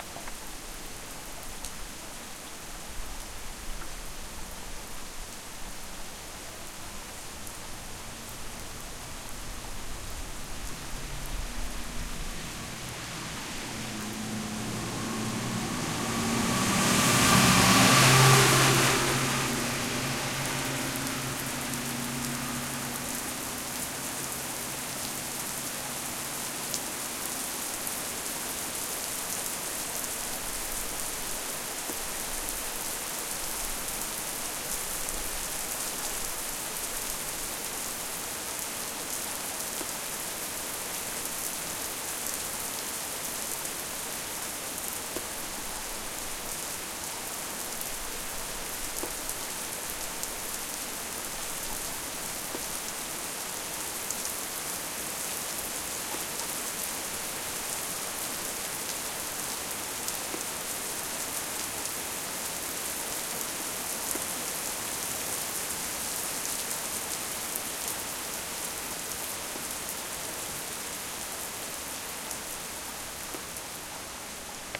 Dorf, Regen, Auto, Stark
A record on a rainy day